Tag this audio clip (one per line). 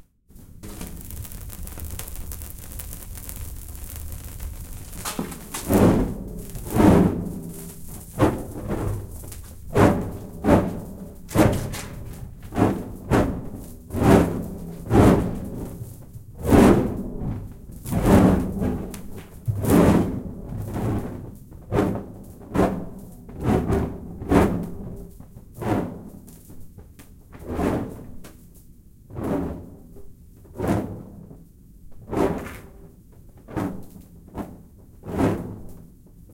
can
fast
fire
flame
movement
rapid
reverb
spray